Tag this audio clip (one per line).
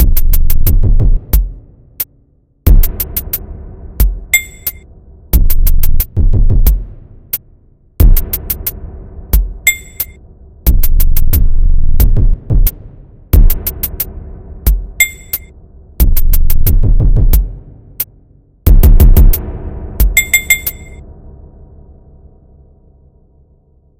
drums
trap
beat
hip-hop